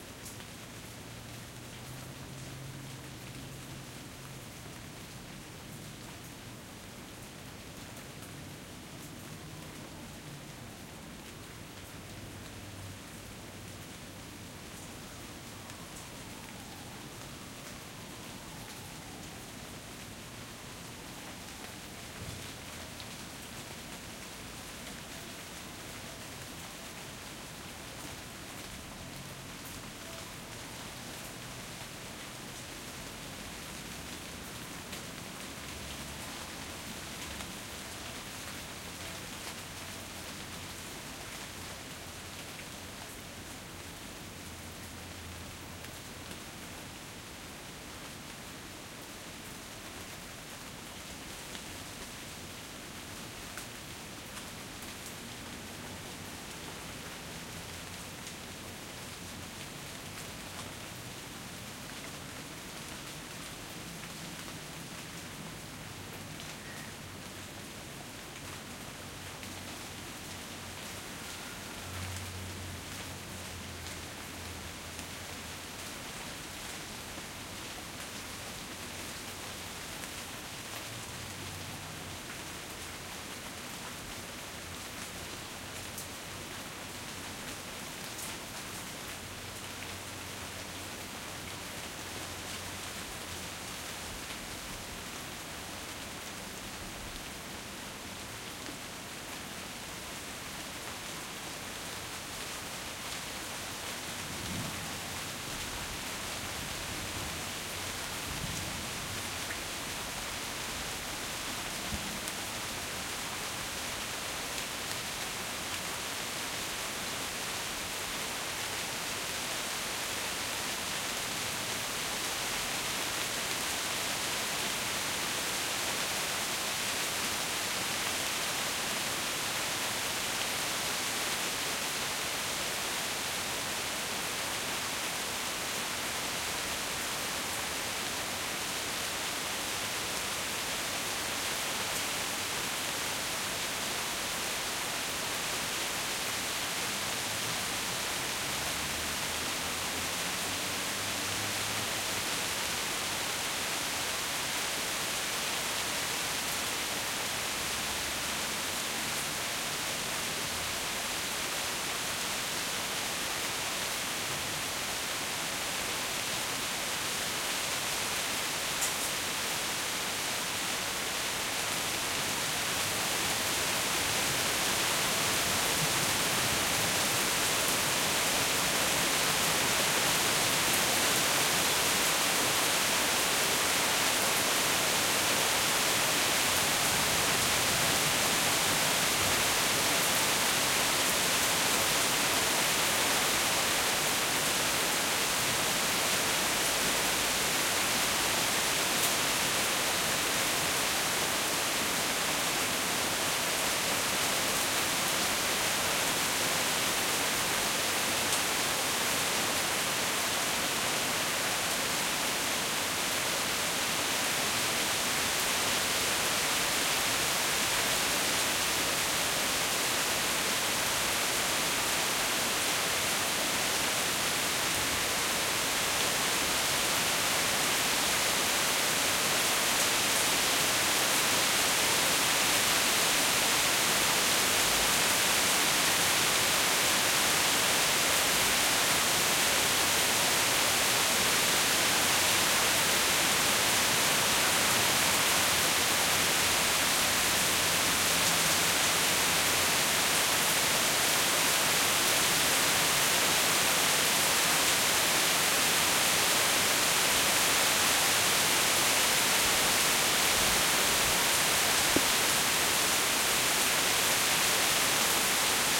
rain porch light medium heavy
heavy, light, medium, porch, rain